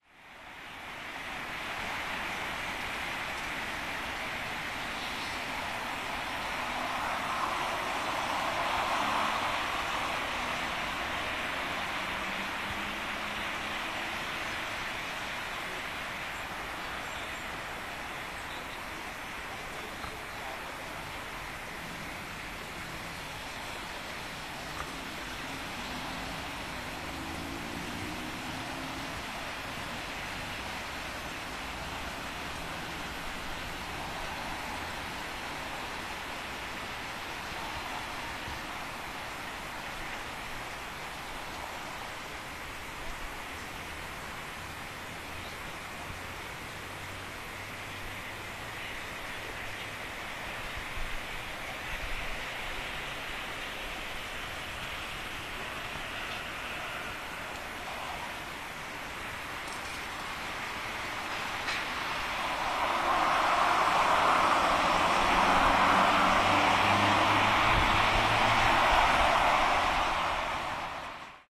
green square ambience 011110
01.11.2010: about 13.40. the general ambience of green square on the corner Krolowej Jadwigi and Gorna Wilda street. sounds of passing by cars, tramways.
ambience, cars, field-recording, green-square, noise, park, poland, poznan, street-traffic, tramways